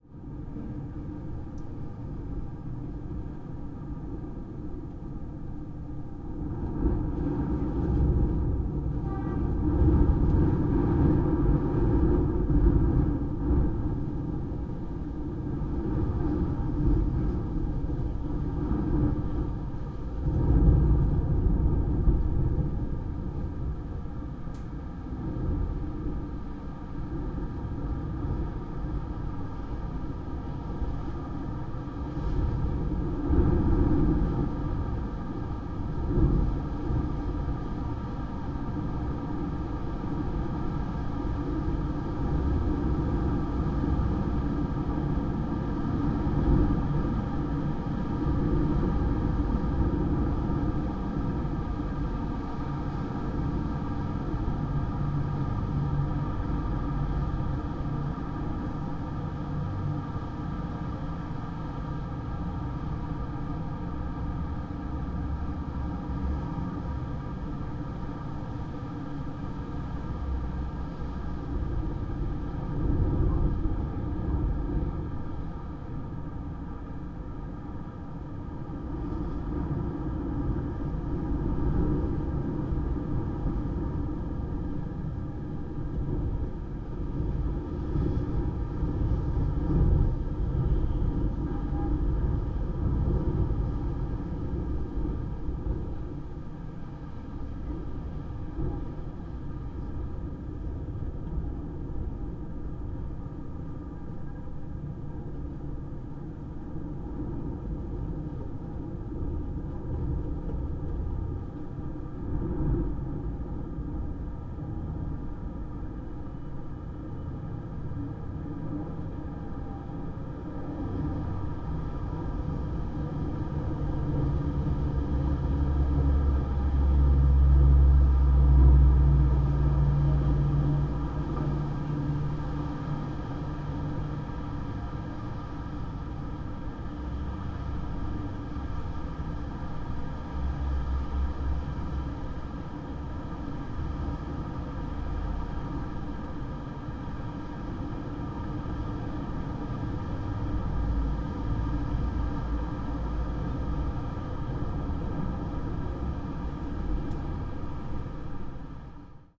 Stereo recording with microphone pointed up a fireplace to the chimney. Urban location; sounds of traffic are heard in the distance along with wind in the chimney. Rode NT4 mic.
chimney, fireplace, traffic, urban, wind